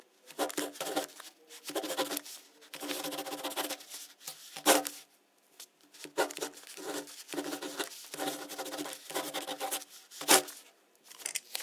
Writing fast with a pen. recorded with Rode NT1000